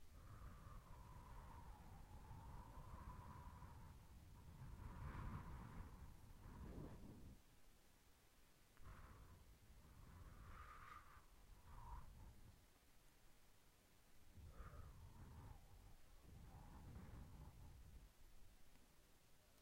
W i n d (White Noise) 19 seconds
A windy day, either a ghost town in a mexican stand-off or a empty october forest. Use for whatever you would like.